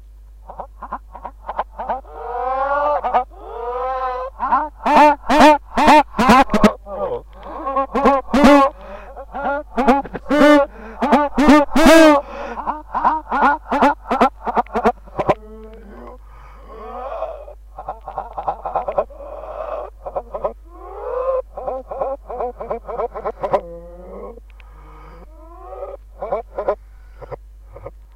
Reverse laughter
A reversed laughter sounds really weird.